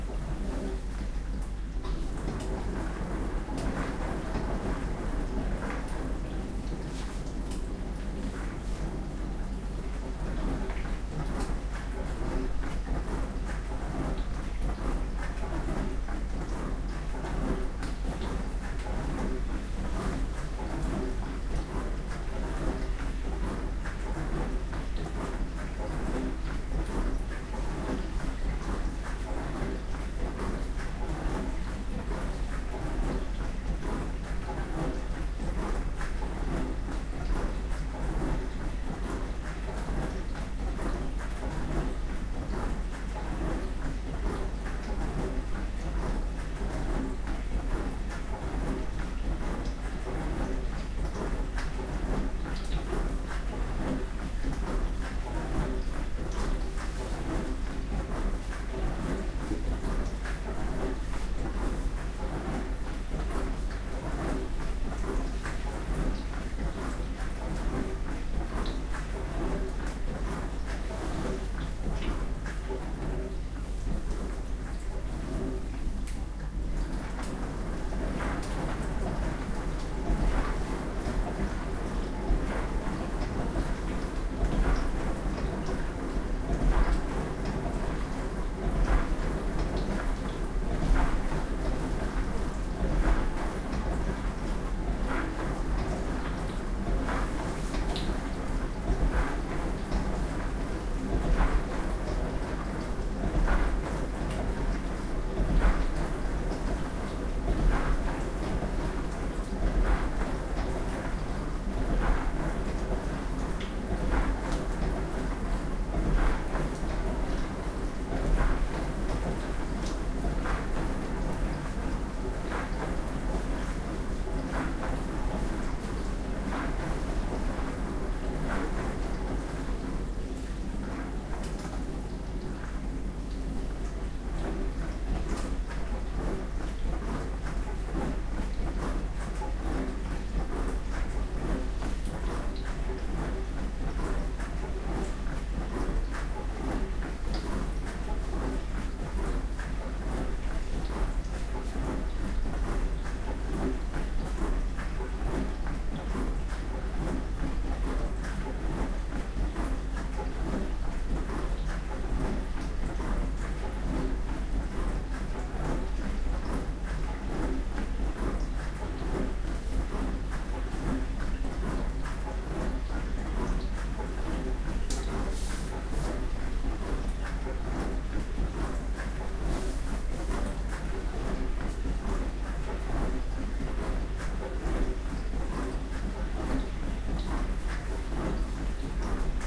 Electric dish washer